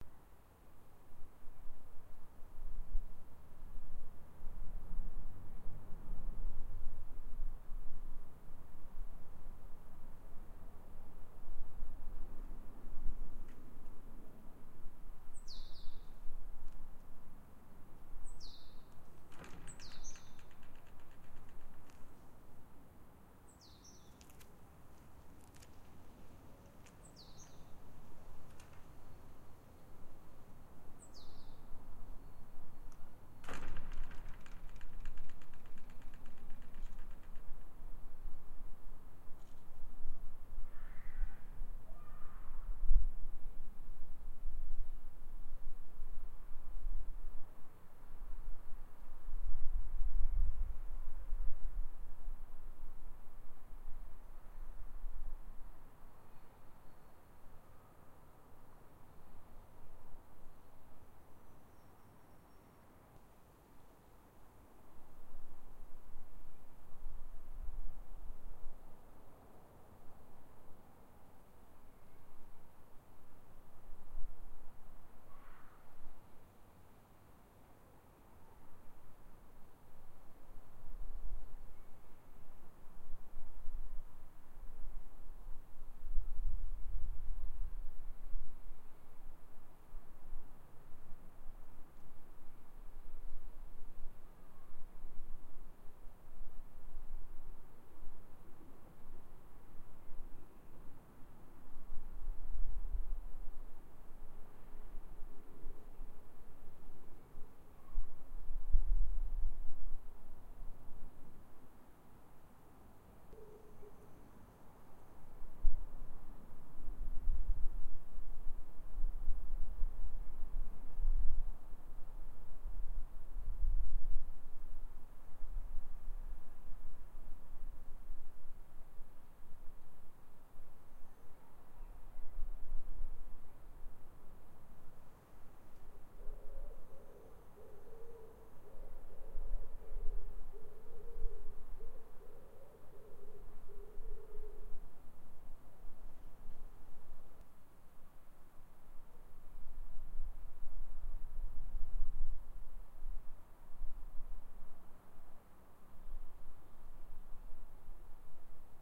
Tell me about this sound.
Atmospheric wind
Outsite wind Noise
winds, Outside, more, wind, Atmospheric, Noise